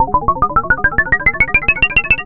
ambienta-soundtrack moog-sweep pt02-up
used intensively in the final chapter of "Ambienta" soundtrack! i'm proud enough of this sound that I have tried to design and re-produce for along time till obtaining a satisfactory result (i realized the square waveform was the key!!). it's a classic moog sweep you can ear in many many oldschool and contemporary tunes (LCD Soundsystem "Disco Infiltrator"; Luke Vibert "Homewerks"; Beck "Medley of Vultures" ..just to make a few examples). sound was bounced as a long sweep, then sliced as 6 separate perfectly loopable files to fit better mixes of different tempos: first 2 files is pitching up, pt 2 and 3 are pitching down, last 2 files are 2 tails pitching down. Hope you will enjoy and make some good use (if you do, please let me ear ;)